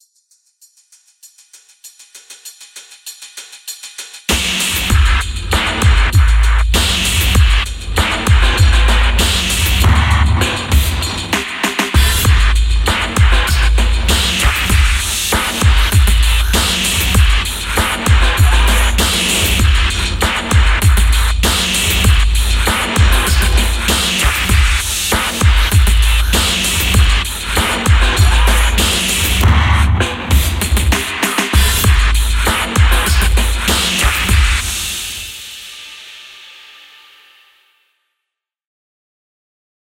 canvas break 98 BPM
A fat beat that runs in 98 BPM, you got the bass and the beatbox so what more do you need?
Technote:
Audiosurfed my collections of snares,kicks and whatnot's til I had the sounds needed, came up with a hihat combo that triggered my head-nodding and stared creating the beat, then i used ten (10) buses in the sequencer to get the sucking/cool sounds and when happy, took it over to my mastering template.
bass, deep, fat, break, beat